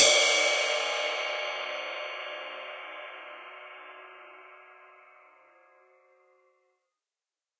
Multisampled 20 inch Istanbul Mehmet ride cymbal sampled using stereo PZM overhead mics. The bow and wash samples are meant to be layered to provide different velocity strokes.
cymbal, drums, stereo